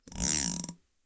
Cartoon Sound 01
Funny sound. Can be used in cartoon or other media project.
cartoon, funny, smile